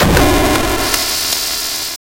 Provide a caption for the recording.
Explosion Beam Weapon 03
8, bit, game, sample, SFX